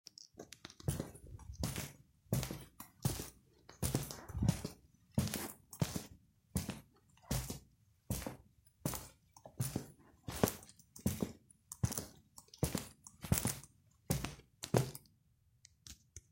Walking on concrete floor
Heavy footsteps on concrete
boots, concrete, floor, foley, footsteps, indoors, shoes, steps, stomp, walk, walking